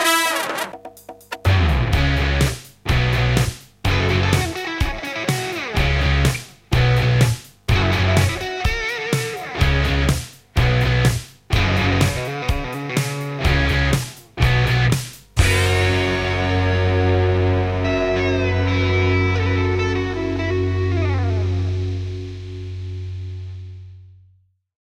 Angus Lejeune Theme
A simple theme in a AC DC style. Made with Ableton live 9, guitar Rig and a Roland R8 Drum machine.